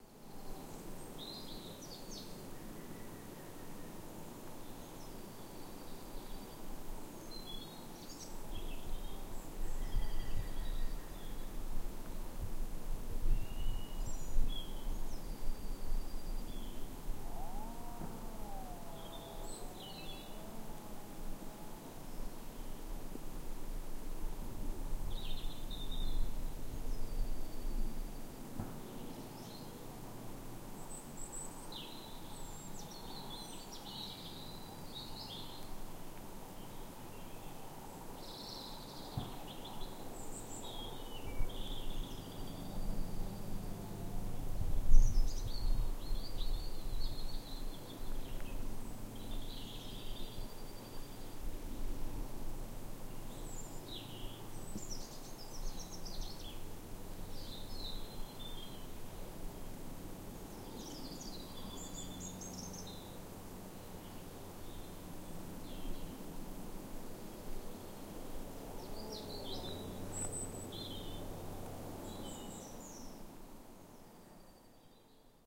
Sk310308 2 green woodpecker 2
A spring day in late March 2008 at Skipwith Common, Yorkshire, England. The sounds of many birds can be heard including the "yaffle" or Green Woodpecker. There are also general woodland sounds including a breeze in the trees and distant traffic. Slight wind noise in places.
field-recording, bird-song, atmosphere, ambience, bird, woodlands